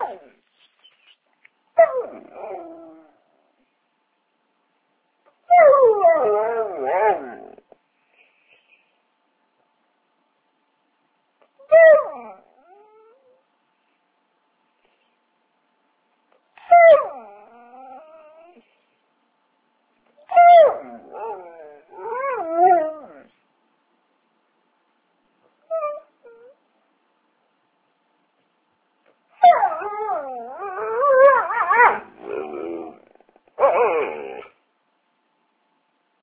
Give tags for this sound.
whine
yelp
puppy
dog
animal
cry
bark
talking
canine
howl
barking
animals
dogs
pet
howling
yelping

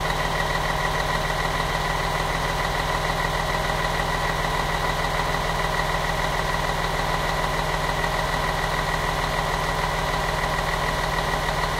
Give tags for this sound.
automatic
bus
car
cars
coach
drive
driving
engine
fuel
h2
loop
motor
standing
static
vehicle
zoom
zoom-h2

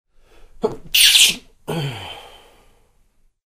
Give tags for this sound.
apsik
cie
kichni

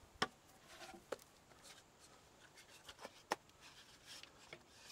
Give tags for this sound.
Handling
Outside

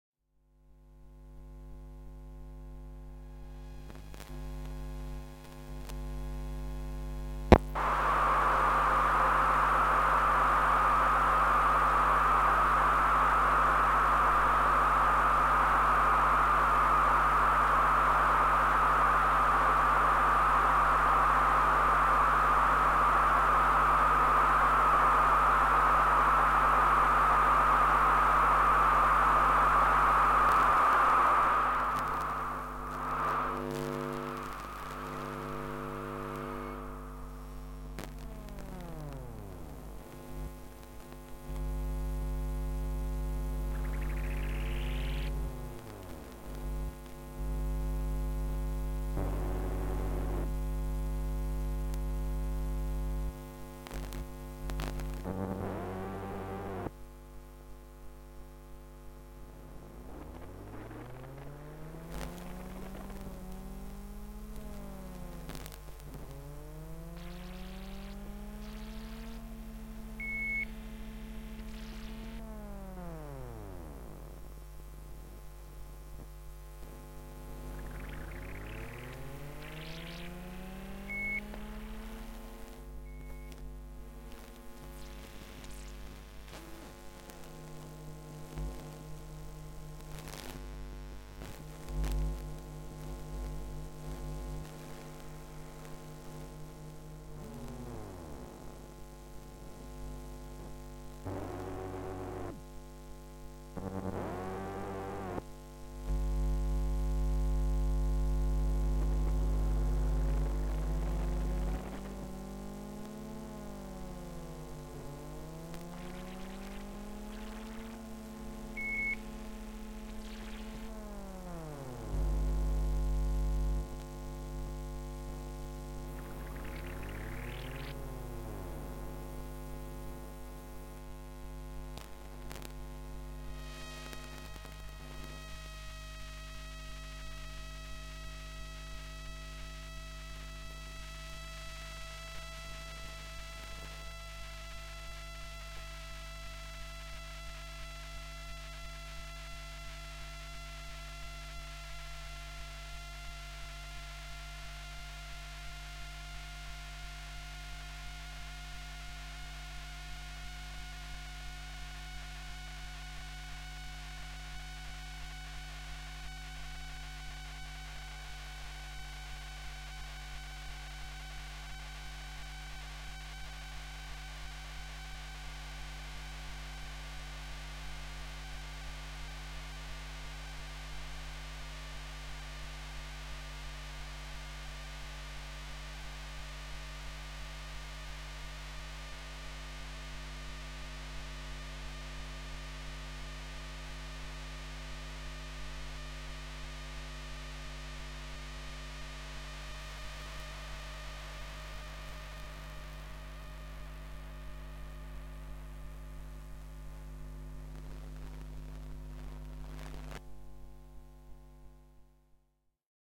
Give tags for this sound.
electronic
experimental
sound-enigma
sound-trip

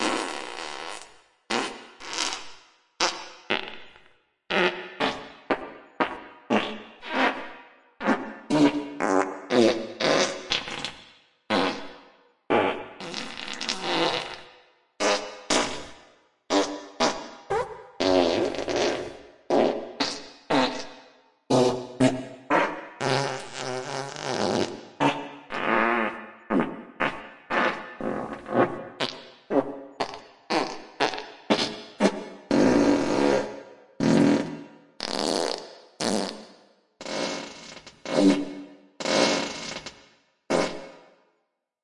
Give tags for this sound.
flatulence
wind
snelheid
Geschwindigkeit
Fart
intestinal
ferzan
brzina
blowing
raspberry
velocidad
gas
vitesse
razz
hastighed
passing

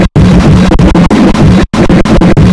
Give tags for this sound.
hardcore
drumloops
drums
breakbeat
electro
extreme
sliced
rythms
processed
electronica
experimental
glitch
acid
idm

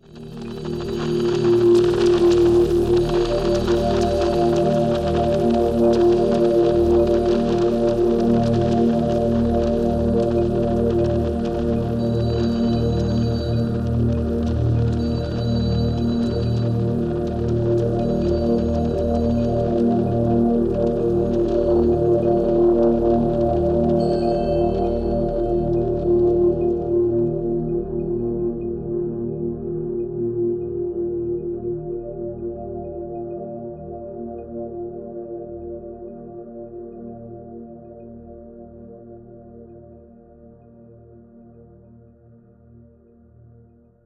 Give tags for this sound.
space
multisample
pad
granular
ambient
texture
digital
synth

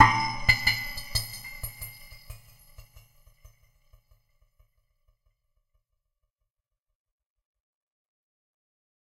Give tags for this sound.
Abstract
Metallic
Percussion
Sound-Effect
Spark
Sparkling

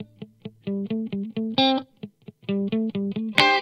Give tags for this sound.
electric
guitar

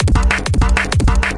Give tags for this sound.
anarchy
breakcore
core
digital
electronic
experymental
extremist
future
glitch
lo-fi
loop
noise
overcore
sci-fi
skrech
sound-design
soundeffect

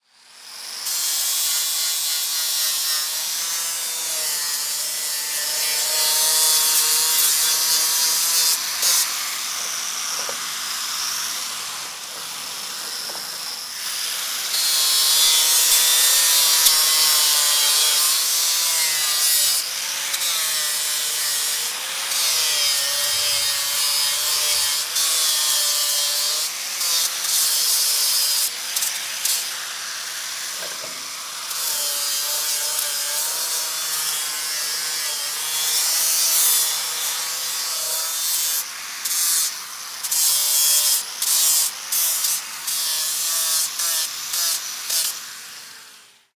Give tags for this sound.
factory
field-recording
griding
industrial
industry
machinery

